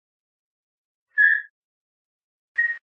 Tire squeals. Two individual screeches from my car on a garage floor.
Recorded with Zoom H5 with XY capsule. Heavily noise-reduced and EQ'd with high pass.